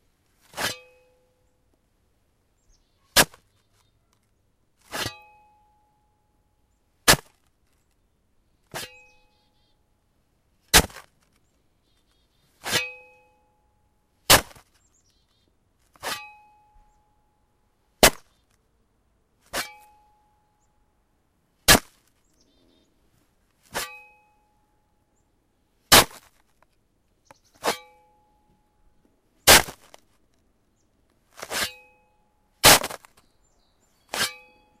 I made this recording by using a metal shovel and ramming it into the icy snow. The snow has been around for a couple months now and has been rained on and turned to tiny ice crystals. It sounds like it could be sand, grainy dirt or rock dust.